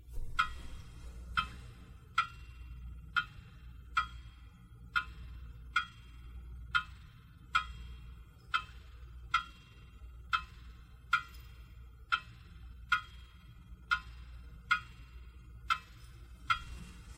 The sound of a grandfather clock ticking.
ticking, ambient, grandfather, clock